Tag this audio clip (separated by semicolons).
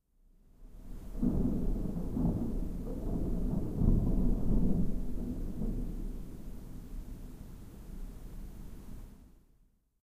thunder; breath; thunderstorm; field-recording; human; body; rain; bed